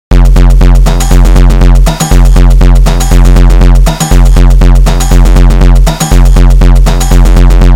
DT Record002
D-Lusion DRUMKiT.
break, drums, industrial, loop